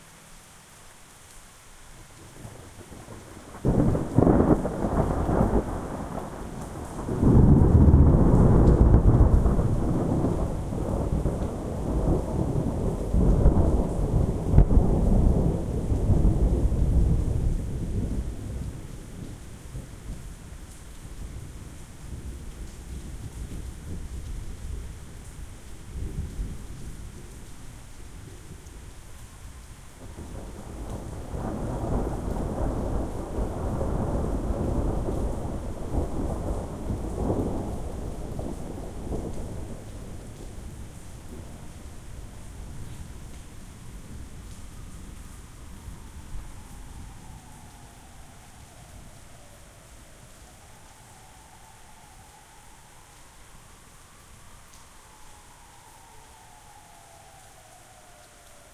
This is a pack of the very best recordings of thunder I made through April and May of this year. Many very loud and impressive thunder cracks, sometimes peaking the capabilities of my Tascam DR-03. Lots of good bass rumbles as well, and, as I always mention with such recordings, the actual file is much better quality than the preview, and be sure you have good speakers or headphones when you listen to them.
bass, thunder, pour, cats-and-dogs, rain, boom, deep, crack, loud, pitter-patter, lightning, rumble, splash, water